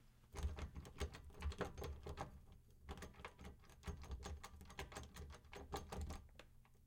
door knob clanking
door, sounds